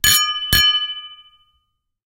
Wine Glass
Cheers, Cin, Glass, Romantic, Toast, Wine, glasses, red